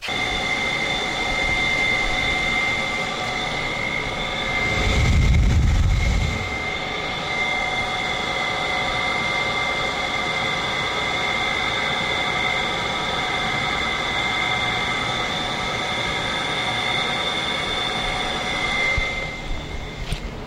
I recorded this sound back in 2002. A vent on top of a building making a weird screaming sound.